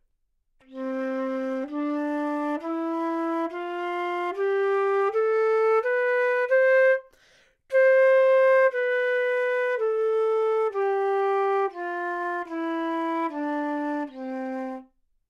Flute - C major - bad-tempo-staccato
Part of the Good-sounds dataset of monophonic instrumental sounds.
instrument::flute
note::C
good-sounds-id::6995
mode::major
Intentionally played as an example of bad-tempo-staccato
scale, Cmajor, neumann-U87, flute, good-sounds